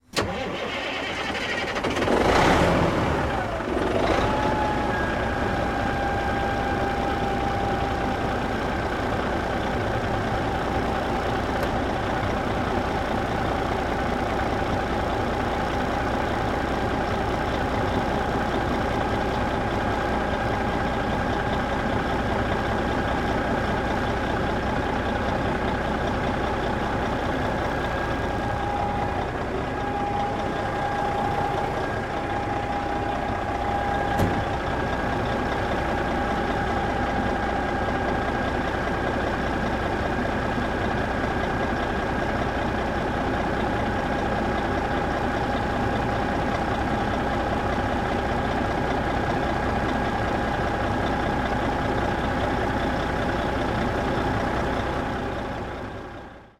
FORD LTL 9000 engine start and idle
This recording is the engine starting and idling of a 1980s FORD LTL 9000 diesel semi tractor. In the middle you hear the truck door slam shut. Recorded with a Rode NTG3 and a Zoom F8 field recorder.
semi, start, motor, tractor, diesel, idle, engine, ford, engine-start